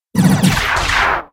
A dual mono synthetic sound created in response to a request by dubfyah.Take 2. I think the first sounded best though.